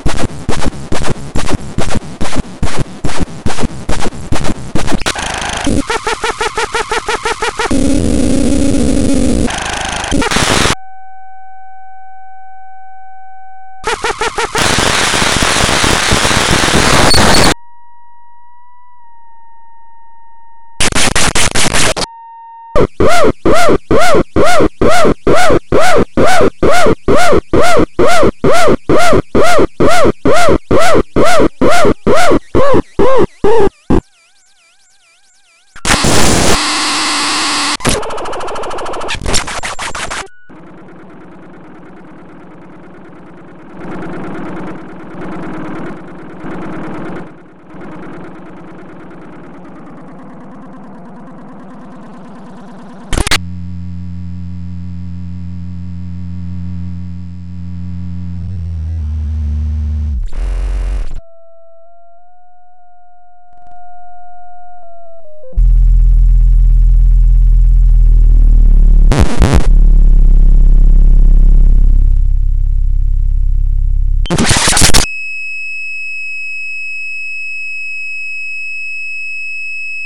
To be played loud to your granny when she has just dozed off in her rocking chair.
bleep, electro, glitch, loud, noise, pain, percussion, processed, radio, scratch